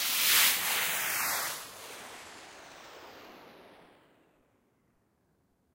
Sampled from my beloved Yamaha RM1x groovebox (that later got stolen during a break-in).
Yamaha-RM1x, fx